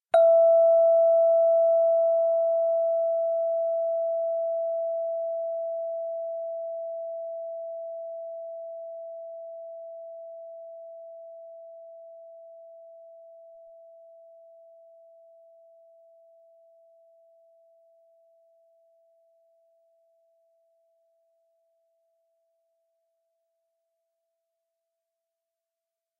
Vibratone (like a big cylindrical tuning fork) struck once, rings on E note, decays to silence.